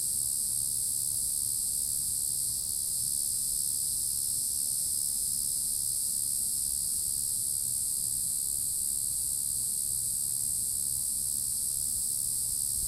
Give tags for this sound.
insects; loud; field-recording; cicada

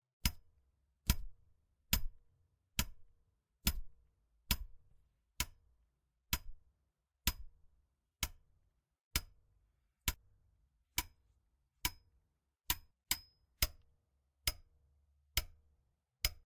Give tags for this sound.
pelea; paliza; Golpe

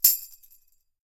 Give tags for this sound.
drums; Tambourine; orchestral; percussive; drum; hand; rhythm; sticks; chimes; percussion; chime; tambour

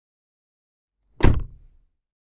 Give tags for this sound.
CZ; Czech; Panska; car; door; noise; slam

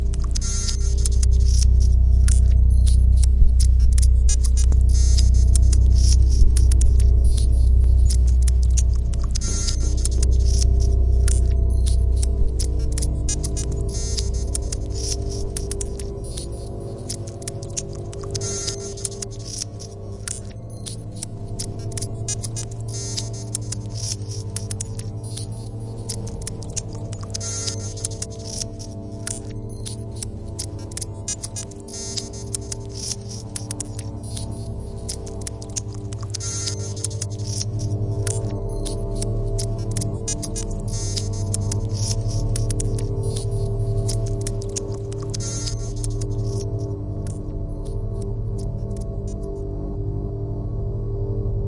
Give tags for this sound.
insects; clicking; brooding; ambience; drone; background; atmosphere; soundscape; ambient; sci-fi; dark

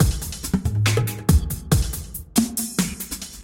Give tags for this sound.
beat; electronic

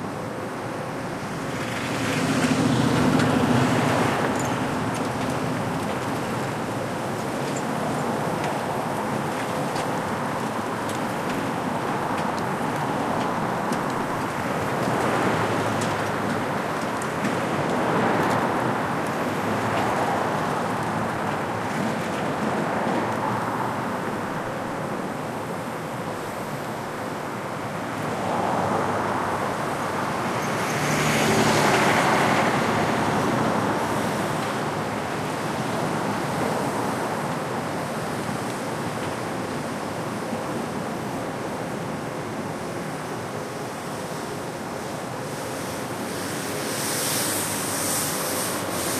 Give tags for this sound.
ambient; wide-range; Times-Square; city; cars; atmosphere; morning; soundscape; street; New-York; people; noise; traffic; ambience; NY; field-recording

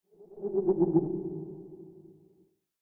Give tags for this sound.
creature; creepy; monster; worm

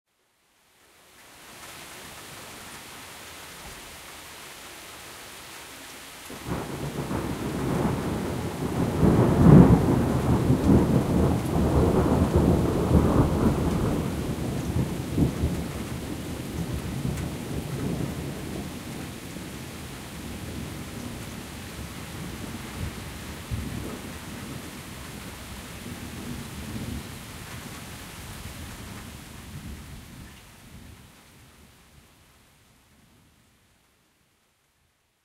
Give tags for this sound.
90degree; distant-thunder; field-recording; light-rain; Zoom-H4n

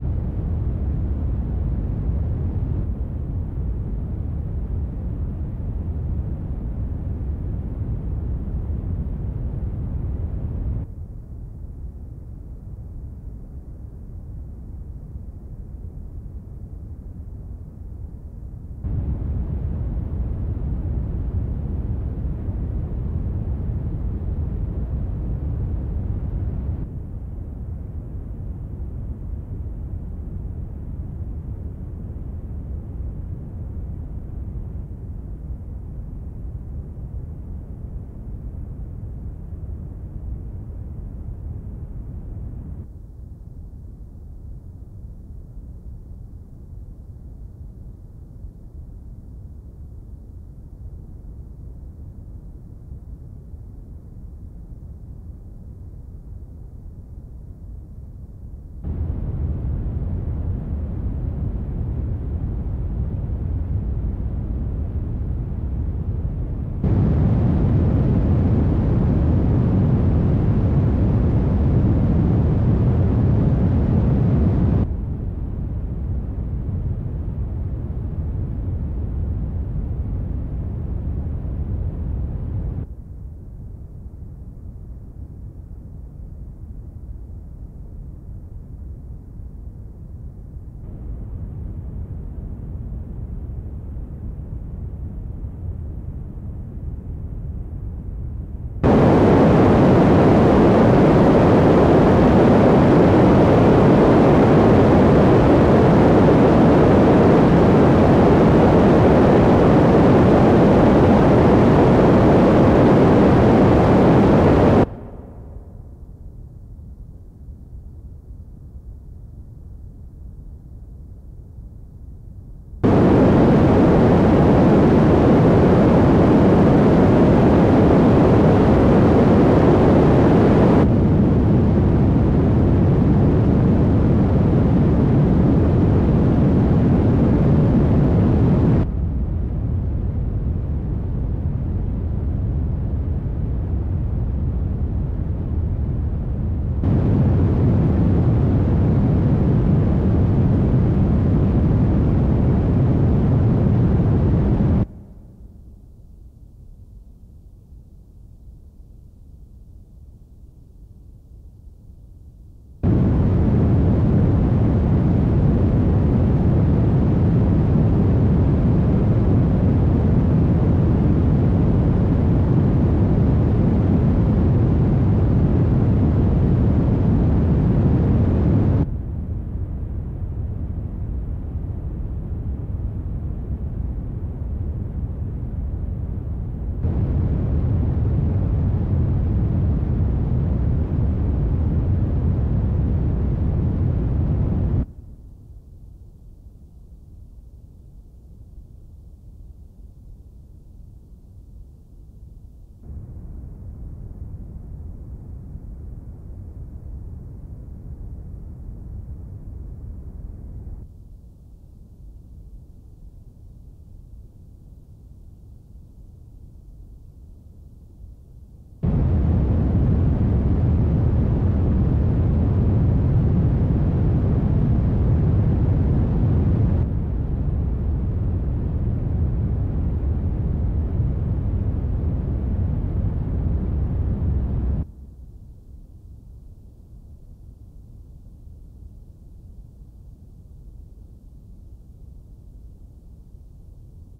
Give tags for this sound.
soundeffect; fx; drone; analog-synth; experimental; noise

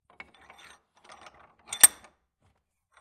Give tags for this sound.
1bar; 80bpm; clamp; leg-vise; metal; metallic; metalwork; steel; tighten; tools

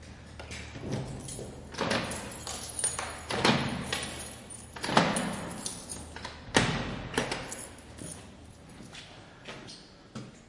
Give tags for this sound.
door; key; locking; field-recording; lock; unlock

